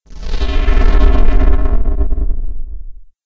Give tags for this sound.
game
animation
movie
monster
demon
cartoon

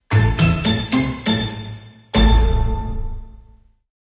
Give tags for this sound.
win
film
fanfare
wrong
movie
end
game
animation
lose
cinematic

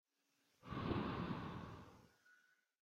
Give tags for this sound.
blow
male
blowing

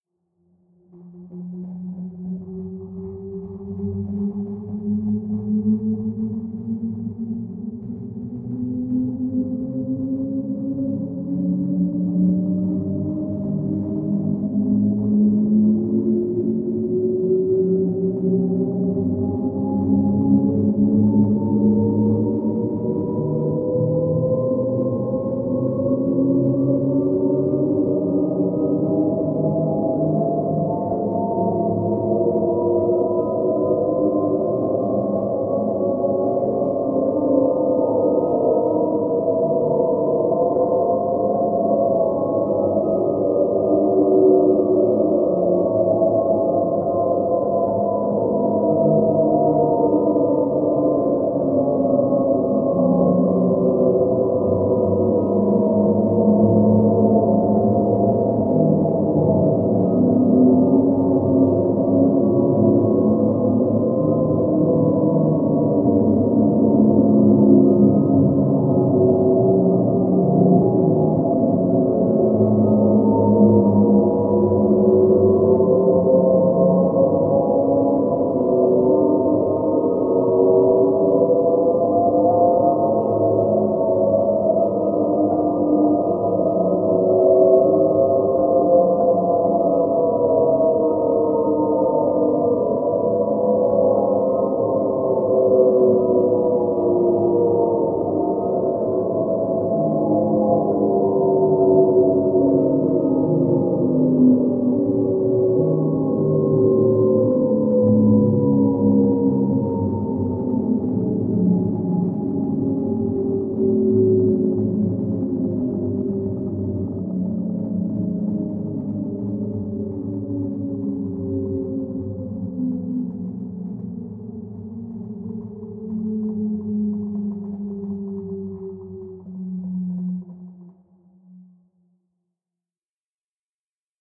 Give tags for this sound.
ambient
drone
reaktor
soundscape
space
sweep

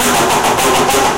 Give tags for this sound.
rhythm
groovy

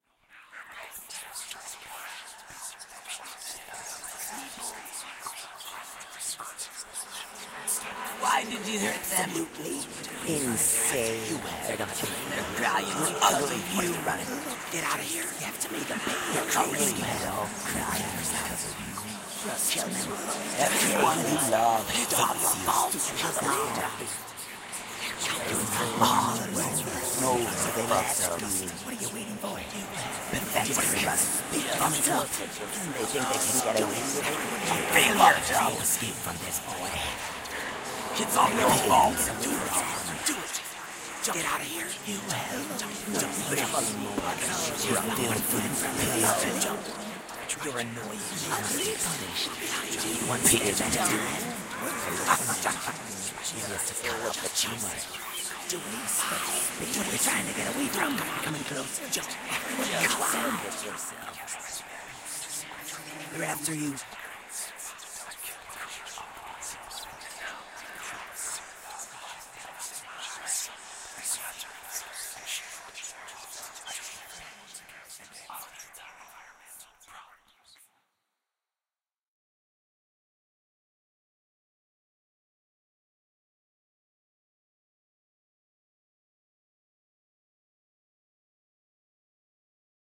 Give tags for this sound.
whispers
ghost-voice
scitsophrenic
Spooky
Creepy
Voices
whispering

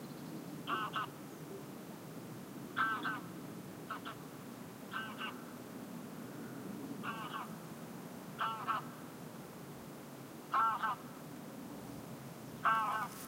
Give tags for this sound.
birdsong
geese
ambiance
Bird
goose
Sounds
ambience
flying
birds
general-noise
field-recording
wings
spring
ambient
nature
bird-sea

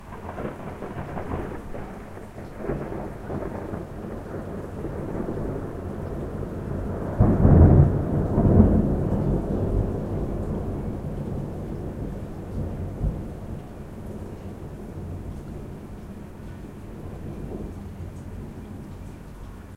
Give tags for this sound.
Alarm
Window
Background
Noise
From
Thunder

